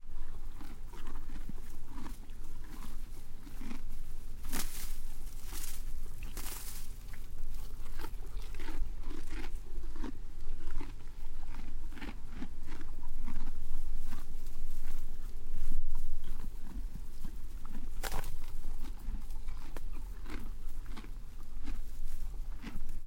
Horse Eating Grass Hay 03
I recorded a horse chewing on/eating grass. There are some low rumbles from the windscreen failing to curb high wind speeds.
Chewing, Eating, Horse